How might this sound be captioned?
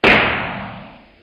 Classic Gunshot
A sound I made that sounds like a gunshot on those old cowboy movies.